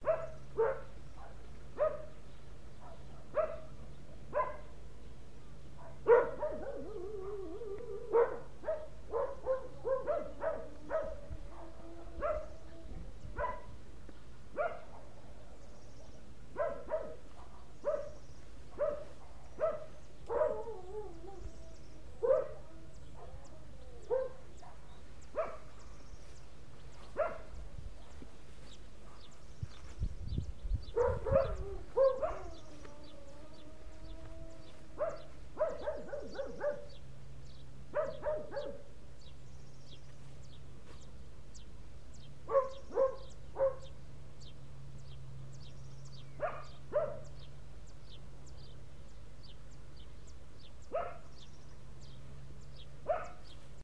Dogs barking in the countryside.
barks, dogs, dogs-barking